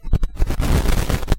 glitch and static type sounds from either moving the microphone roughly or some program ticking off my audacity
digital, electronic, glitch, noise, static